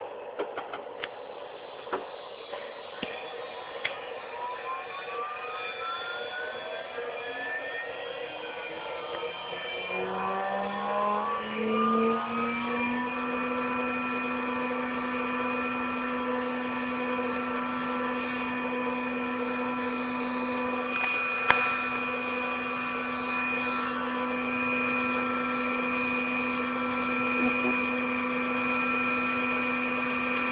spindle motor
high, motor, speed, spindle